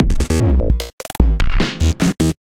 BPM.- 150 Theme.- The go! machine